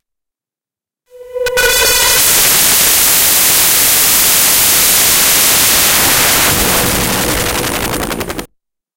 cd-noise
digital noise obtained from a badly burned audio cd